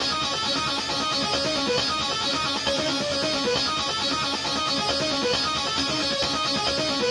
135 Fowler gut 05
distort, bit, blazin, variety, guitar, crushed, synth, gritar